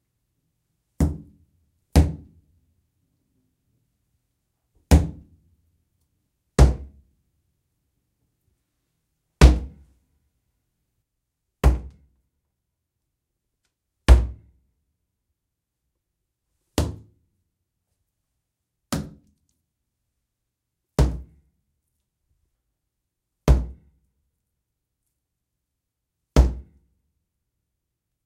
Bathtub hits impacts, cantaloupe melon head
Human head impacting a bathtub, represented by a cantaloupe
head, bath, tub, impact, bathtub, hit